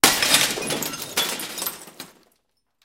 glass being broken with various objects.
break, breaking-glass, indoor, window